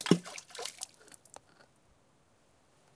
Tossing rocks into a high mountain lake.
water, bloop, splashing, splash, percussion